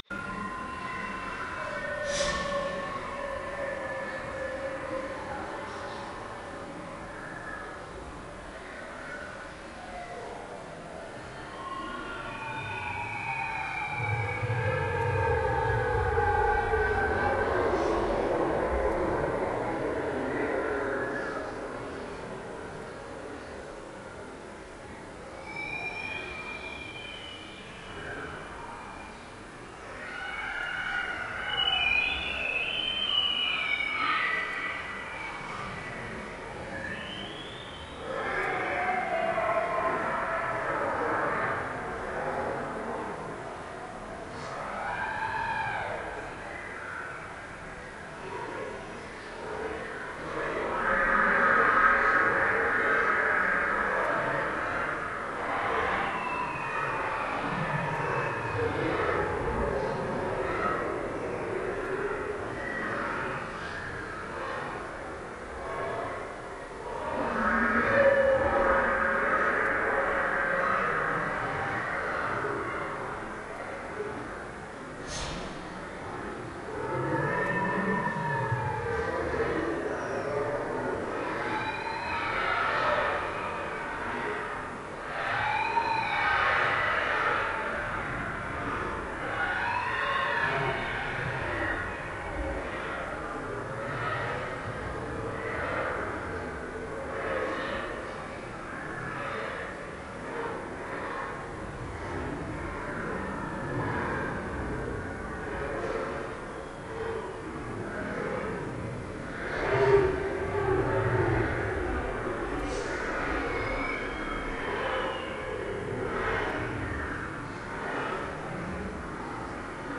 cave monsters
Sound of scary monsters living in caves...
monsters, scary